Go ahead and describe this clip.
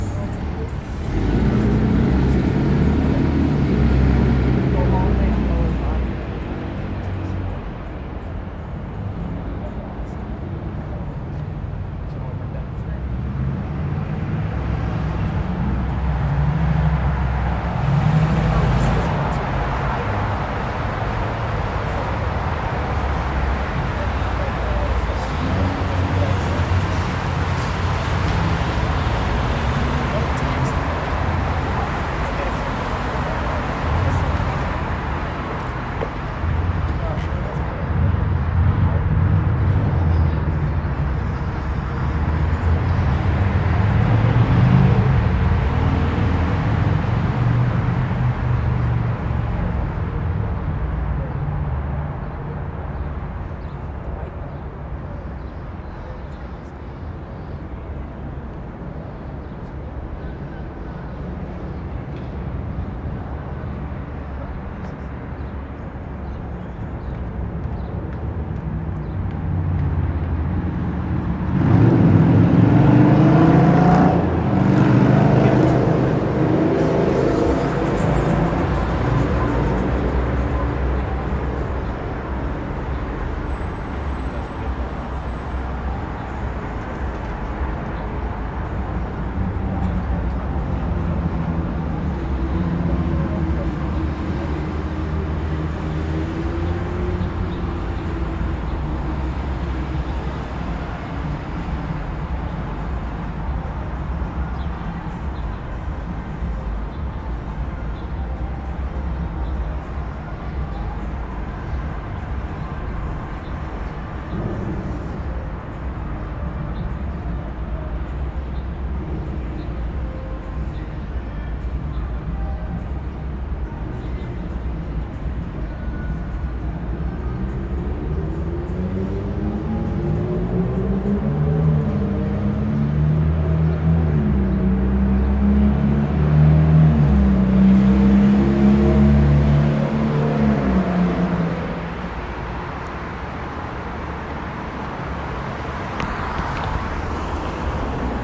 Nashville Streets
On-site recording of streets in downtown Nashville, TN.
ambience, city, field-recording